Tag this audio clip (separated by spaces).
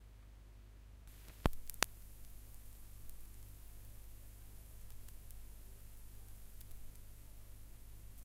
33rpm album crackle hiss LP needle noise player pop record start static surface-noise turntable vintage vinyl